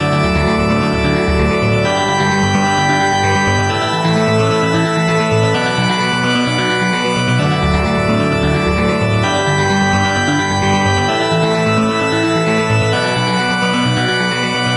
Happy Loop #3
A happy loop made in FL Studio.
2021.
arpeggio, bass, beat, chord, church, electronic, happy, inspired, loop, music, notes, organ, pipe, reverb, synth, synthesizer